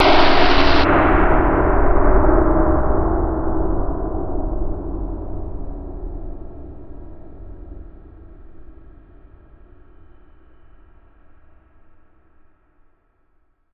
Spooky Surge 2
This is another kind of spooky scary surge that was made with FL.
Spooky, Dark, Train-Station, Electrified, Scary, Surge, 2x-Long